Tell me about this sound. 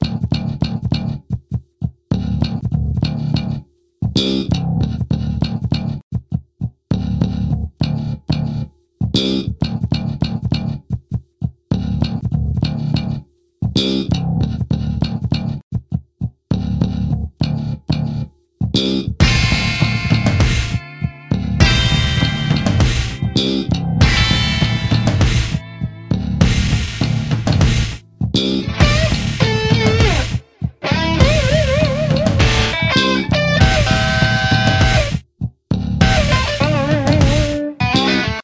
EXCERPT 1 (slap bass, drums, guitars)
intro to a song. cut and paste with magix music maker.
drums, bass, guitars